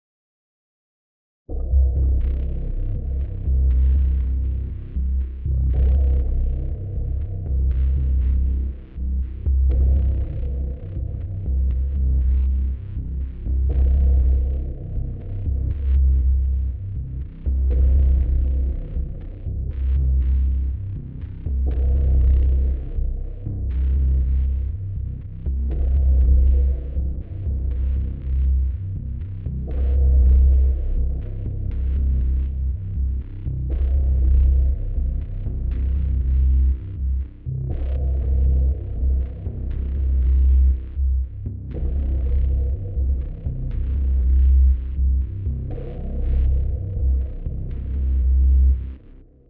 The Plan
This creation is sound background for a scene, it works great with genres such a spy or crime drama. Leaves of feeling of something going down or about to, a plan in the making.
heist, plan, sound-composer